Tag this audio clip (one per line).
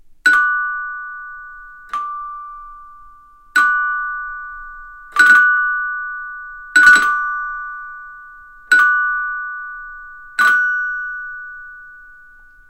bell
doors